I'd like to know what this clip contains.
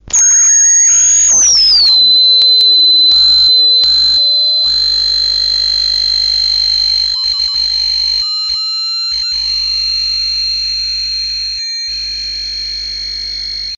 circuit bending baby radio fm
bending, fm, circuit, baby, radio